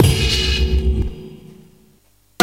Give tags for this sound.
medieval breakbeat medievally breaks amen breakcore dragon rough dungeons

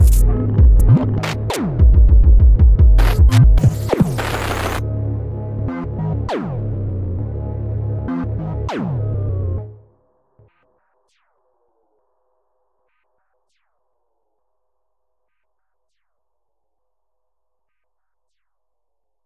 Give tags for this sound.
glitch future sound-design noise electronic abstract lo-fi

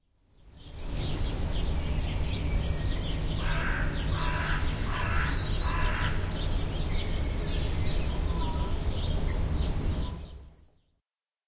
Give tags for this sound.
bird cat field-recording nature street

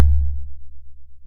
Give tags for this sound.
bassline audiorealism pro tom abl

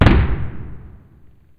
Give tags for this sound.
bang bomb boom explosion firework loud pop rebound

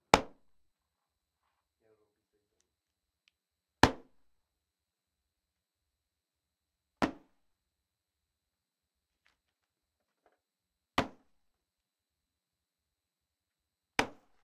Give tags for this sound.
can collision free hit punch trash

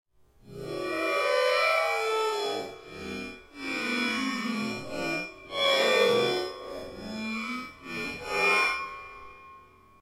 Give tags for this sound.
baking-dish kitchen percussion pyrex resonant

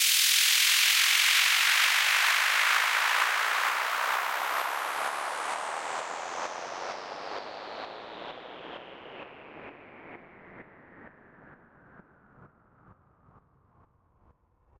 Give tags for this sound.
lunar downlifter

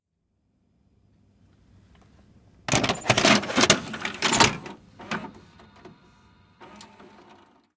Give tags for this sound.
cassette tape vhs

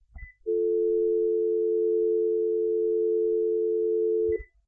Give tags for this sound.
uk-phone telephone office

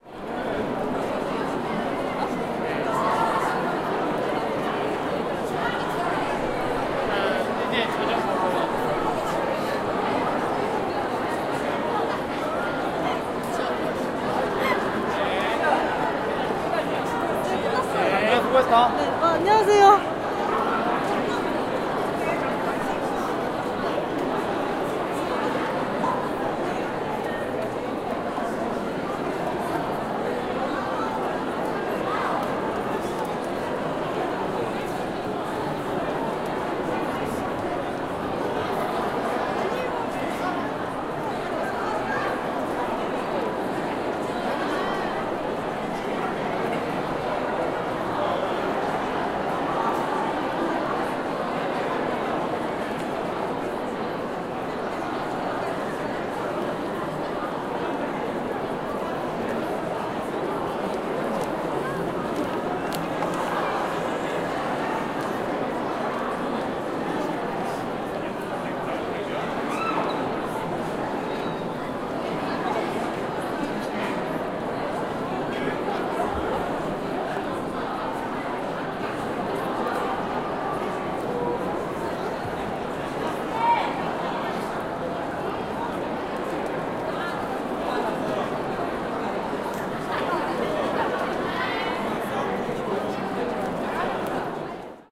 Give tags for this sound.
field-recording korea korean seoul voice

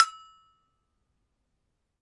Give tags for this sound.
gamelan hit metal metallic metallophone percussion percussive

